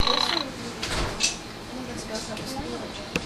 Loopable percussive snippet from inside the gift shop at the Busch Wildlife Sanctuary recorded with Olympus DS-40.
ambient, field-recording, nature, percussion